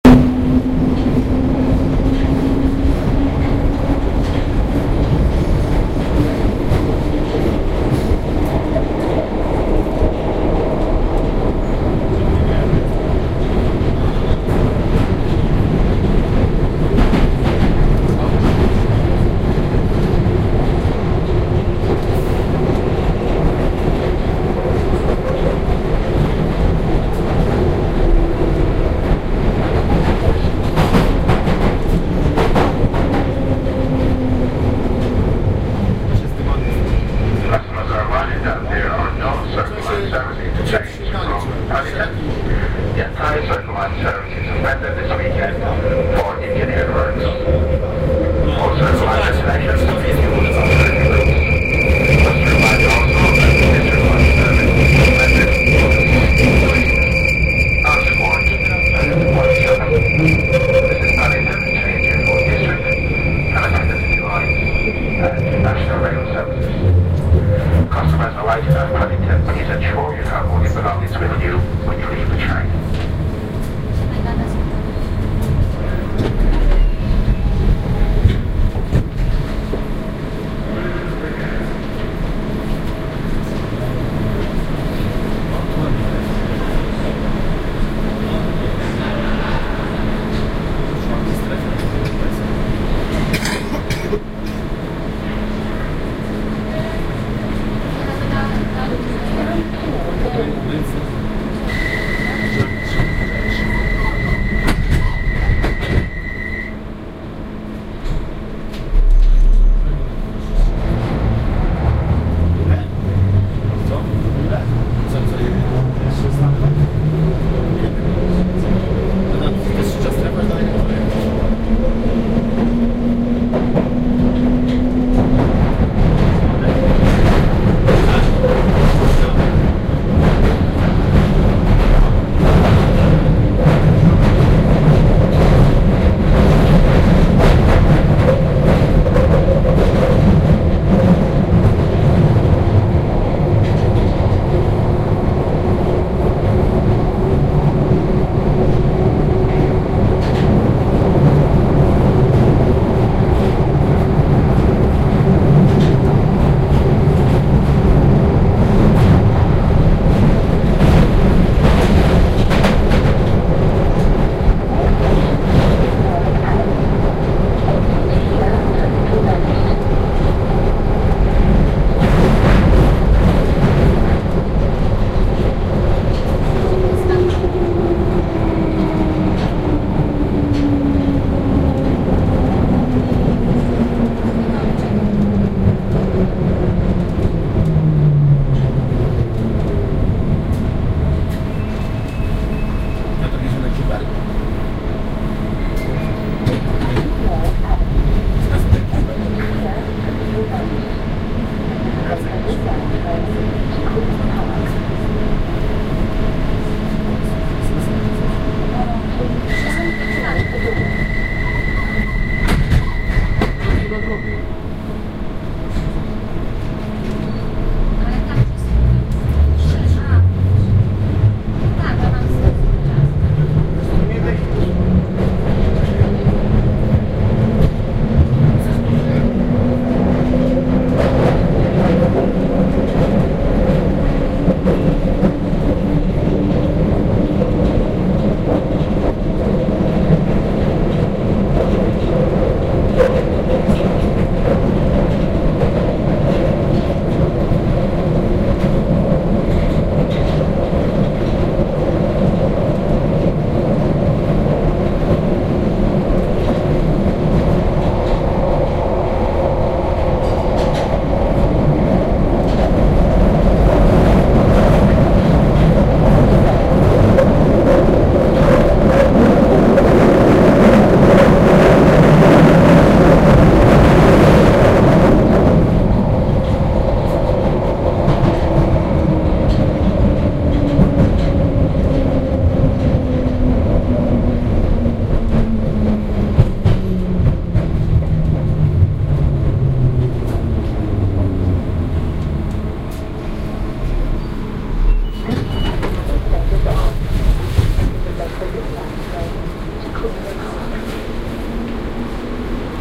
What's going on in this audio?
London Underground: Bakerloo line (overground) ambience
Sound of the Bakerloo line on the London Underground 'Tube' system. Recorded with binaural microphones on the train.
announcement, arrival, binaural, departing, departure, england, field-recording, headphones, london, london-underground, metro, platform, rail, railway, railway-station, station, subway, train, trains, train-station, transport, tube, underground